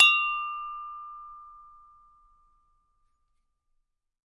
Cup Hit With Pen 2
Coffee cup struck with a pen. Lots of harmonics and reasonable decay.
chime, coffee-cup, cup-strike